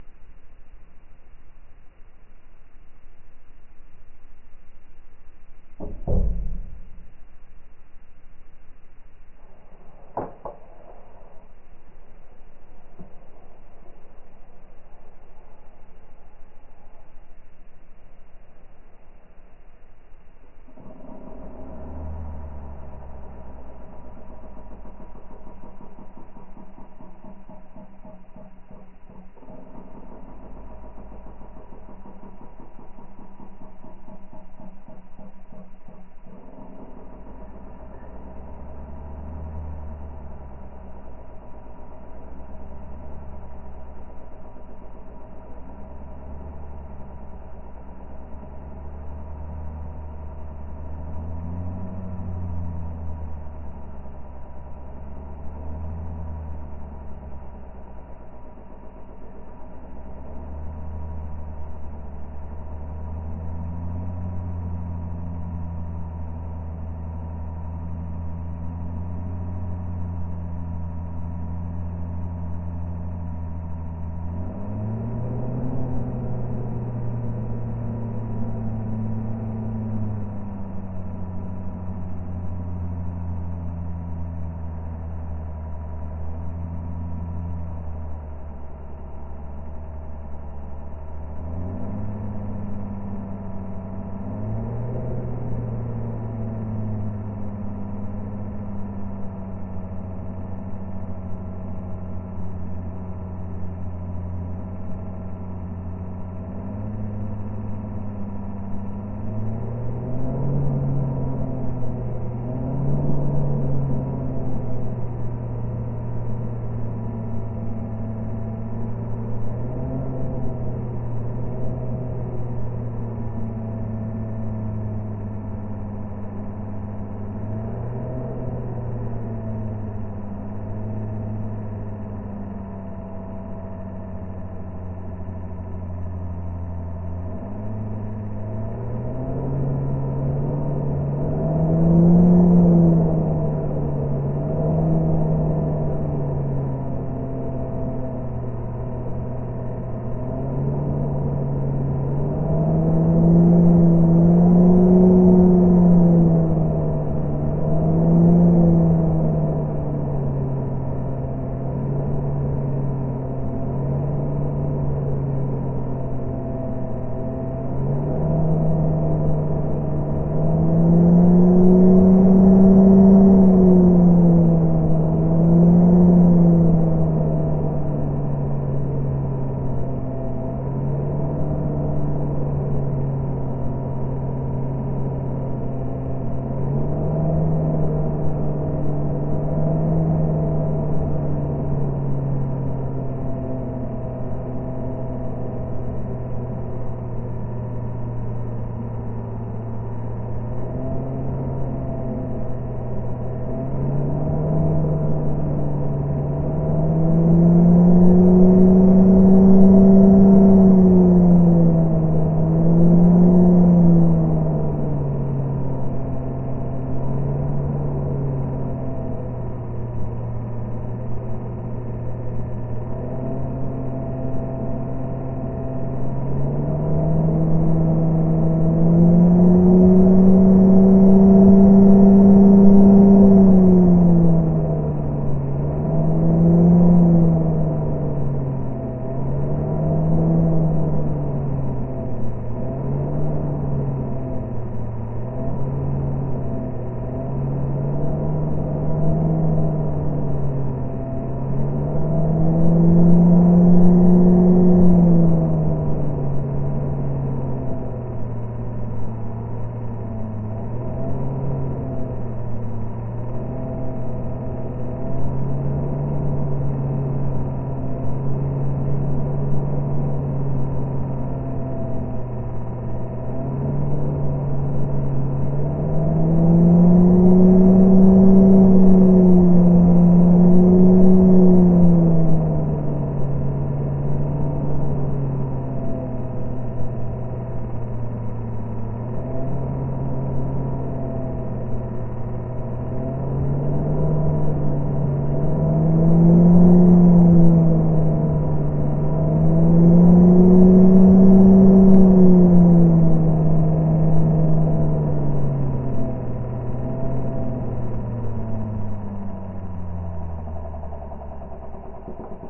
This is frim the machine room for a water jet system with many nozzles. A computer dirigates the dancing water cascades, which vary from 1 meter high to more than 15 meters. A ver complicated servo system decides when a nozzle operates: direction, time, pressure, nozzle number are components. The spinning sound you hear is from one of many water jet pumps, which idle most of the time, while they suddenly must work at maximum speed. The water jet playing use idling pumping so that pressure can rise momentarily. This is a pygmee water jet player compaared to those in Las Vegas, but the principles are the same.